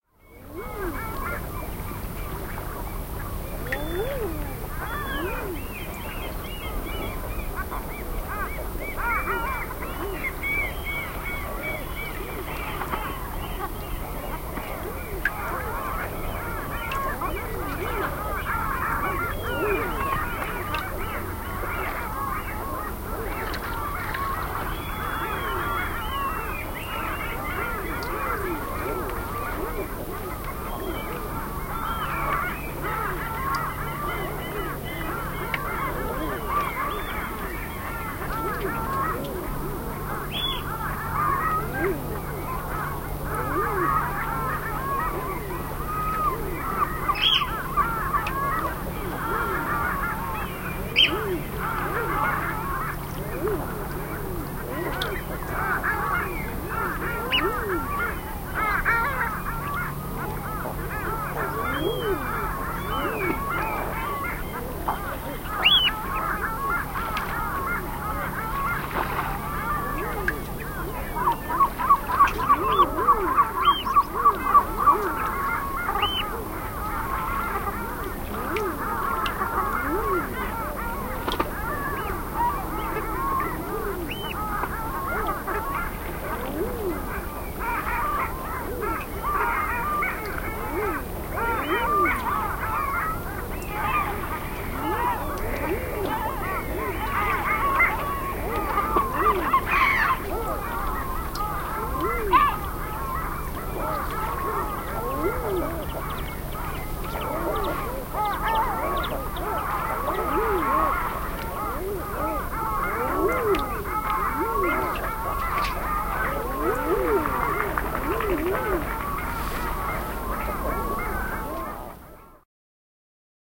Lintuja merellä, kevät / Birds at sea in the spring, waterbirds, seabirds, intense sounds, lots of birds, eiderducks, long-tailed ducks, seagulls, also other birds, sounds of water
Paljon lintuja, haahkat, allit ja lokit ääntelevät kiihkeästi, myös muita lintuja. Veden ääniä, aaltoja ja tippoja.
Paikka/Place: Suomi / Finland / Hanko
Aika/Date: 13.05.2003
Sea, Tehosteet, Meri, Nature, Bird, Yleisradio, Spring, Soundfx, Alli, Birds, Luonto, Eiderduck, Vesi, Linnut, Finland, Lintu